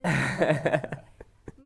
Laugh Man 1 20 years old
Young man laughing reaction